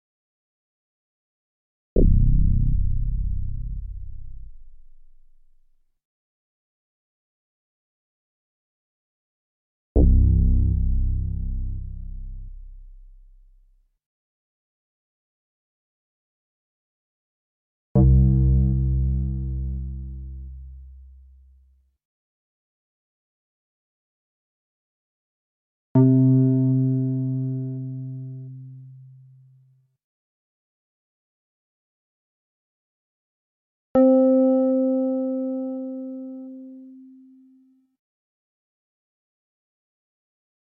EVOLUTION EVS-1 PATCH 077
Preset sound from the Evolution EVS-1 synthesizer, a peculiar and rather unique instrument which employed both FM and subtractive synthesis. This "drainpipe" sound - used for the bass on many dance records - is a multisample at different octaves.
bass, dance, drainpipe, evolution, evs-1, patch, preset, synth, synthesizer